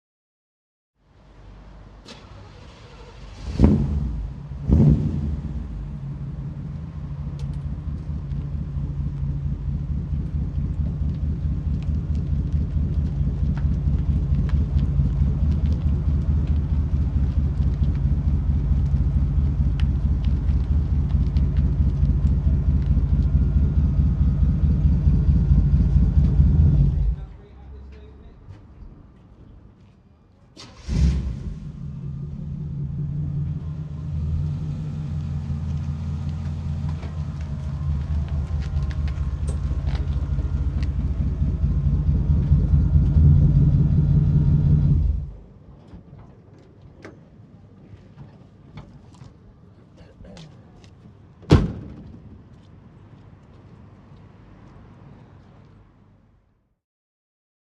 Recorded on Zoom H4N with Rode NTG-3.
The sound a vintage 1950 Ford Mercury car with v8 engine starting up, driving by slowly and shutting down twice with some background voices.